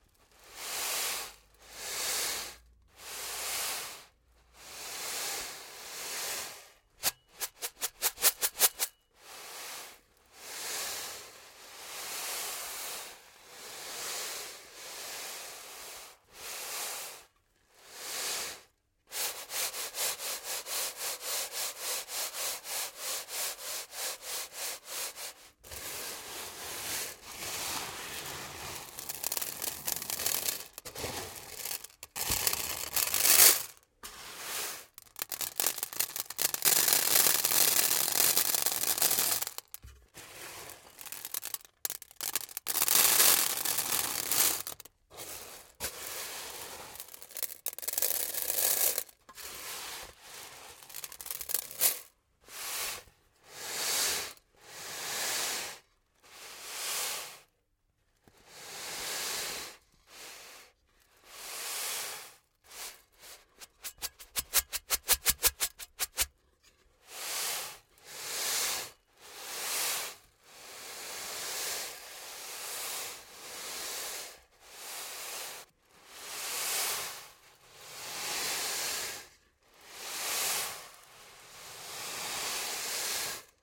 Captured using a Røde NT2000 set to its omni configuration and a Zoom H6 recorder.
Cutting and some denoising in iZotope RX7.
Recorded as part of my "One sound per day 2018"-project.
Would love to hear what you're using it for.